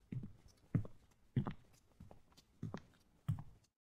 The sound of indoor footsteps
floor, footsteps, wood, steps, ground, feet, foot, walk, hardwood, Indoor, footstep, walking, step